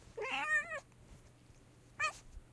Quick recording of my cat meowing, recorded on Tascam DR-07 and edited through Audacity.